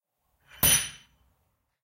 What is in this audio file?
Sound of a metal bang with a high pitch. Can be used for a factory or industrial environment or a sound effect.